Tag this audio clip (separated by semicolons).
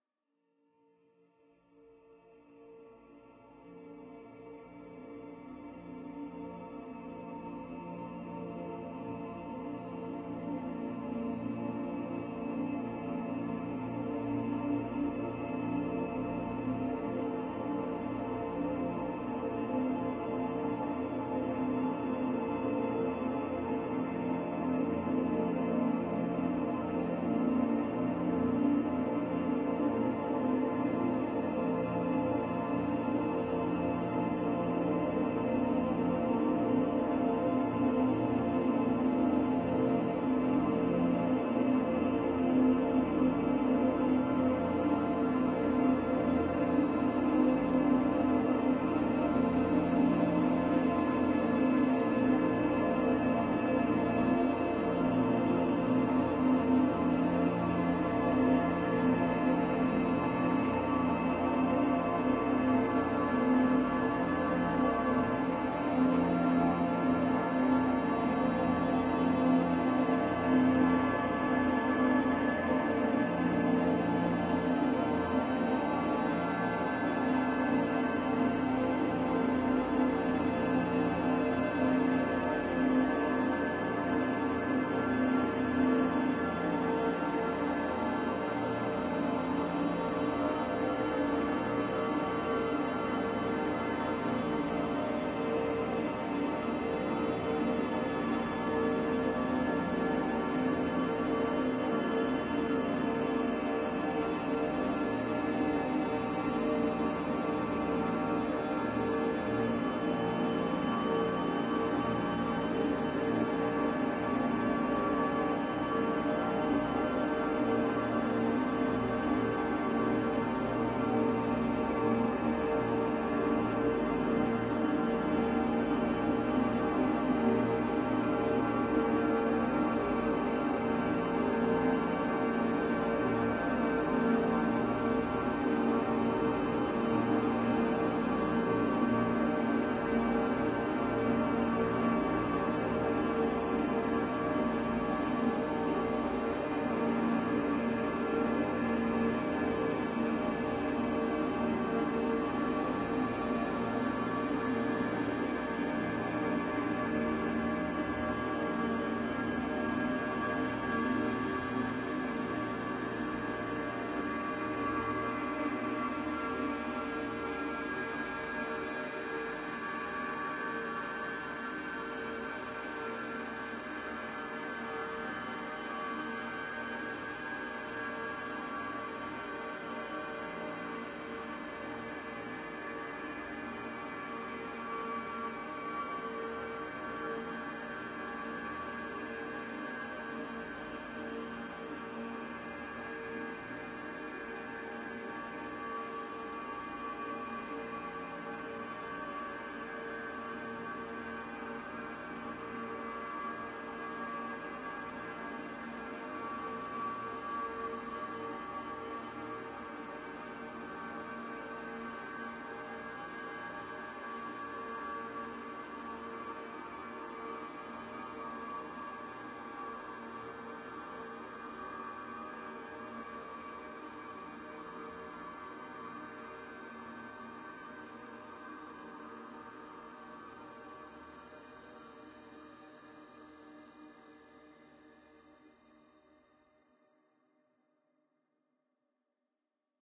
divine; dream; drone; evolving; experimental; multisample; pad; soundscape; sweet